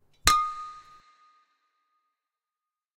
D#5note (Glass)
Water glass struck by chopstick. Notes were created by adding and subtracting water. Recorded on Avatone CV-12 into Garageband; compression, EQ and reverb added.
clear-note, fast-attack, medium-release, single-note, strike, Water-glass